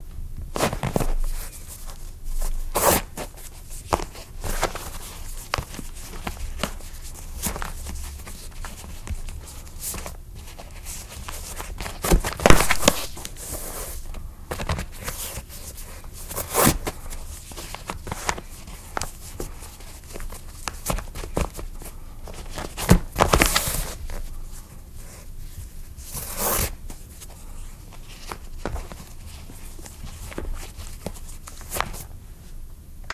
tying shoelaces
loop, swoop, and pull.
shoelace, shoes, sneakers, tying, untied